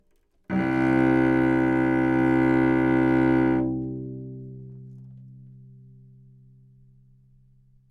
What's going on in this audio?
Cello - C2 - other
Part of the Good-sounds dataset of monophonic instrumental sounds.
instrument::cello
note::C
octave::2
midi note::24
good-sounds-id::235
dynamic_level::f
Recorded for experimental purposes
single-note, C2, good-sounds, neumann-U87, multisample, cello